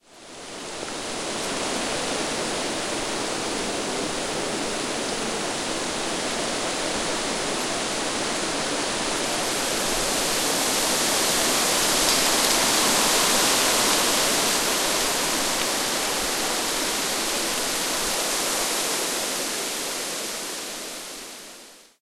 Wind blowing through the trees in a forest in Sweden at night. There is no rain, only wind blowing in the trees.
air, blowing, foliage, forest, leaves, night, trees, wind